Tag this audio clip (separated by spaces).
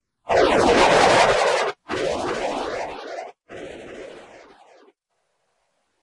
Decrescendo Atmospheric Soundscape Boomerang Sound-Effect